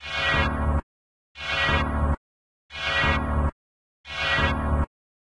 Industrial Synth
a Sound I've made using ableton, massive synth & morph 2.
phase, morph, massive, distortion, synth, electronic